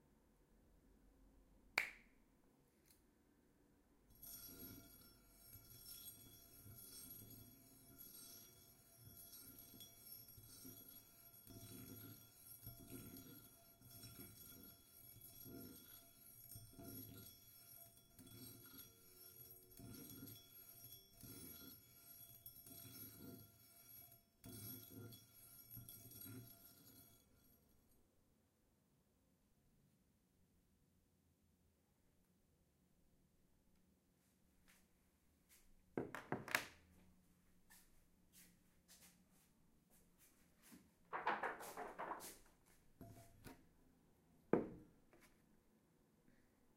This is a unprocessed recording of a steel brush rubbing over an antique iron